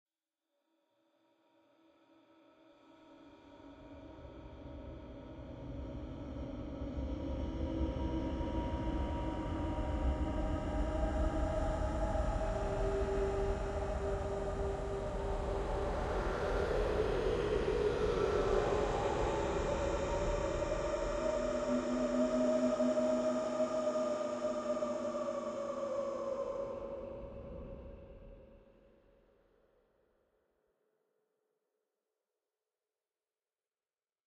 Just some examples of processed breaths from pack "whispers, breath, wind". This is a compilation of some granular timestretched versions of the breath-samples.